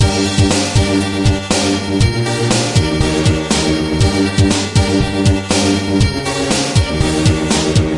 Loop Evil Mecha 00
A music loop to be used in fast paced games with tons of action for creating an adrenaline rush and somewhat adaptive musical experience.
Game, Video-Game, battle, gamedev, gamedeveloping, games, gaming, indiedev, indiegamedev, loop, music, music-loop, victory, videogame, videogames, war